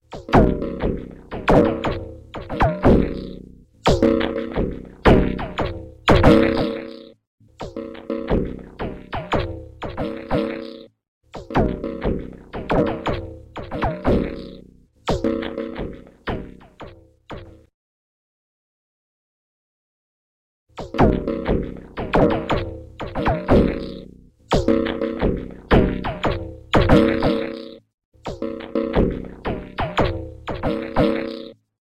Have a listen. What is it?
MultiTwang for FrSnd
More with the twangs already like previous file upload Had some fun with delay analog and suchlike in Adobe Audition v3